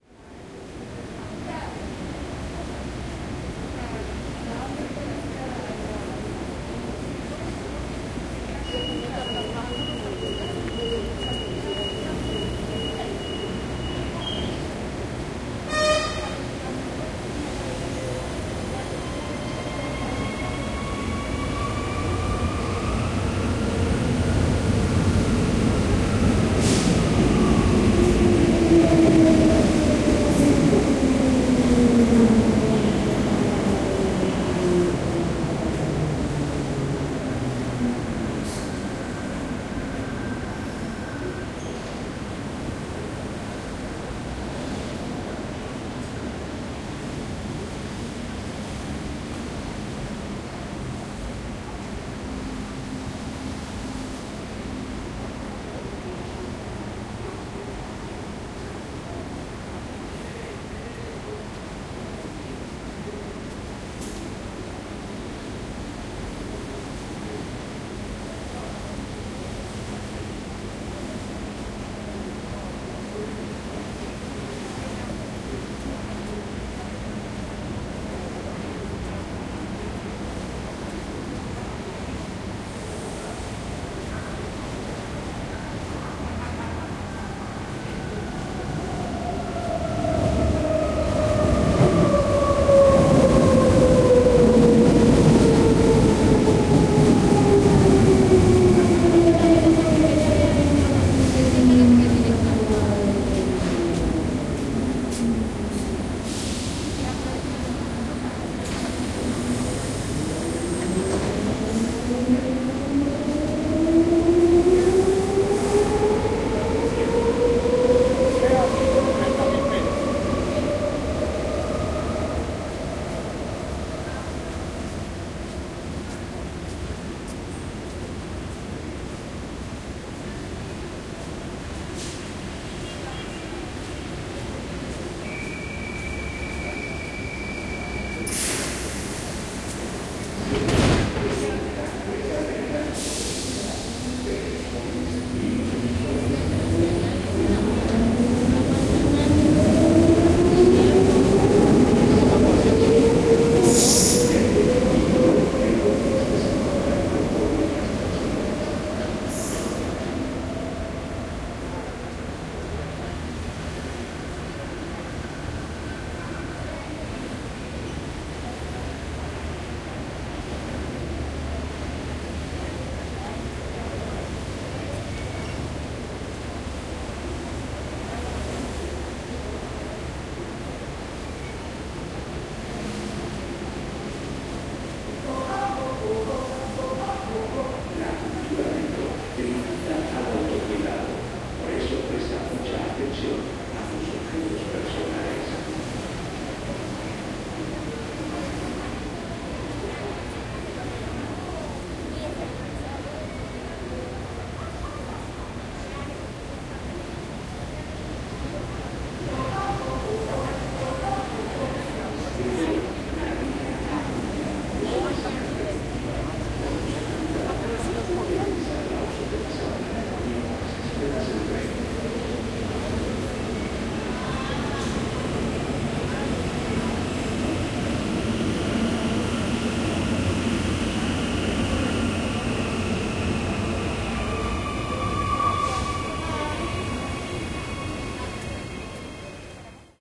Medellin Metro Busy Frequent Walla AmbiX
Ambience and walla from a Medellin's metro station with frequent trains passing by AmbiX Recorded with Zoom H3-VR.
Busy-Subway, Walla, Ambience, Crowd, Metro, Waiting-Subway